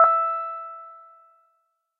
This sample is part of the "K5005 multisample 05 EP
3" sample pack. It is a multisample to import into your favorite
sampler. It is an electric piano like sound with a short decay time
that can be used as bass or lead sound. In the sample pack there are 16
samples evenly spread across 5 octaves (C1 till C6). The note in the
sample name (C, E or G#) does indicate the pitch of the sound. The
sound was created with the K5005 ensemble from the user library of Reaktor. After that normalizing and fades were applied within Cubase SX.
reaktor, electric-piano, multisample